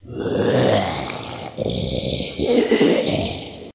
It is the sound that i made by myself. Used mic-delay only.